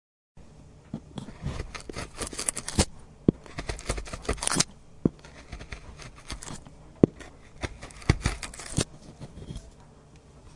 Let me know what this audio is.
peeling wood
just peelin wood nothing wrong with that is there is sound so good.
board, chisel, peel, wood, woodpeel